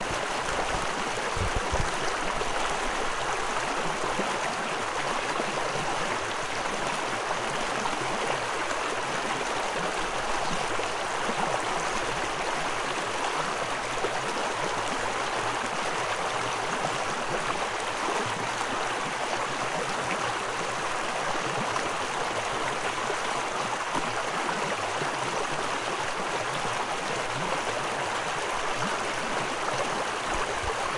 Sound was recorded at a local stream in Northville, MI. The sound was recorded by sticking the recording device as close to the water as possible without damaging the equipment.
Rocky, Small, Stream, Water